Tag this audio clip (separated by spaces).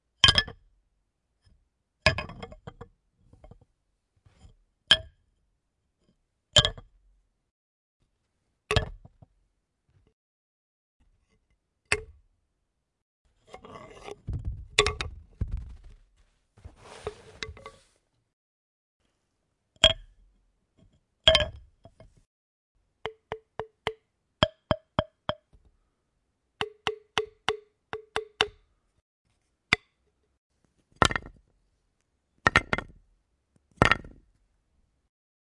caida drop impact madera wood